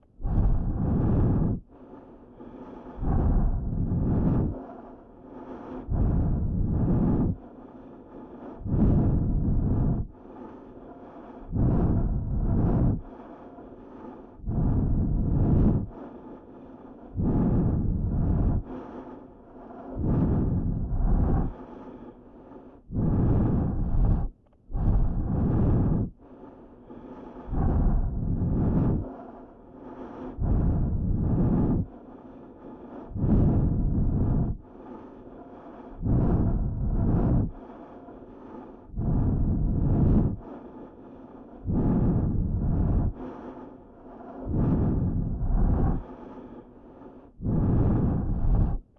Horror Atmosphere2
Some good old fashioned horror ambience. Made using Mixcraft 9.
loop, Horror, Ambience, digital